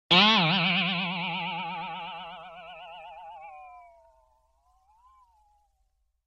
Boing Box 01
Effect, Jump, Spring, Cartoon